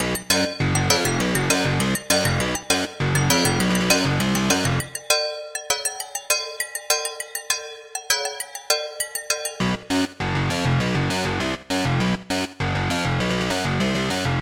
Gang of the black sprites
Stupid melodic loop (bass and percs).
3 parts :
1 - bass and percs
2 - bass
3 - percs
200bpm, dumb-loop, hardtek, loop, melodic-loop, stupid, techno-loop